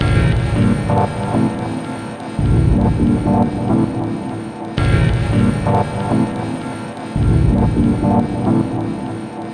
Synth Loop 4
Synth stabs from a sound design session intended for a techno release.
design, electronic, experimental, line, loop, music, oneshot, pack, sample, sound, stab, synth, techno